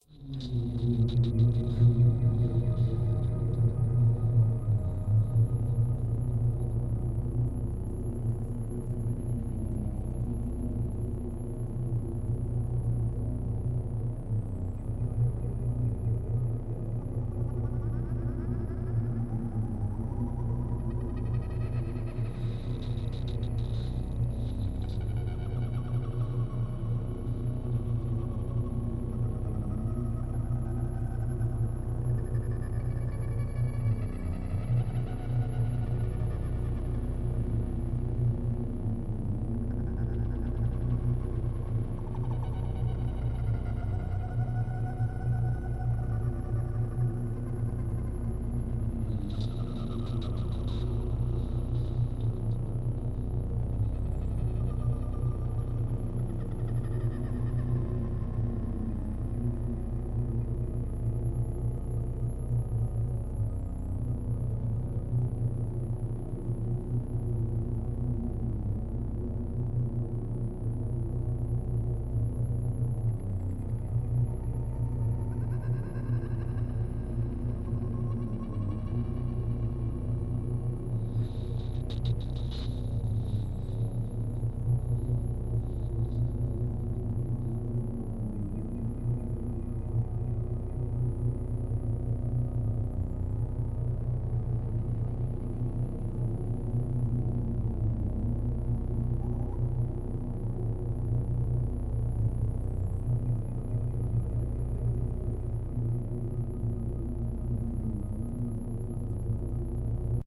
Dark Drone 7
A spooky synth drone for ambience. Long enough to be cut down to a desired length, but simple enough that you could probably find a good looping point if you need it longer.